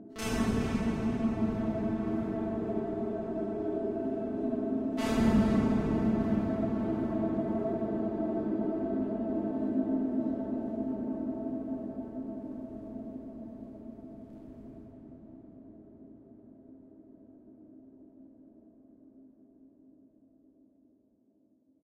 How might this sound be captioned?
LAYERS 001 - Alien Artillery is an extensive multisample package containing 73 samples covering C0 till C6. The key name is included in the sample name. The sound of Alien Artillery is like an organic alien outer space soundscape. It was created using Kontakt 3 within Cubase.